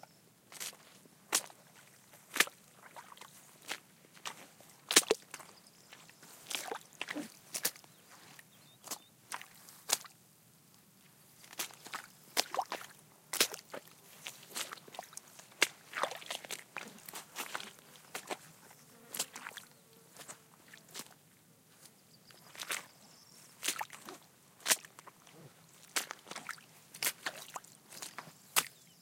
sound of footsteps while walking through a muddy swamp / pasos al caminar por una laguna fangosa